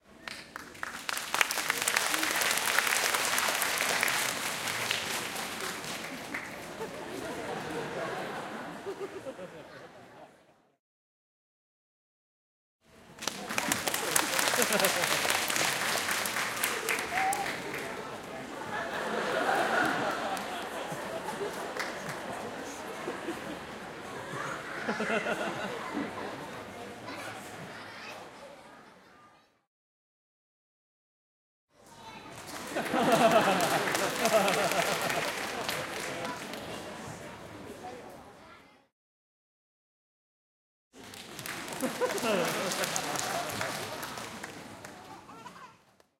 190627 clapping crowd with laugh
crowds clapping with laugh in the hall
applause, laugh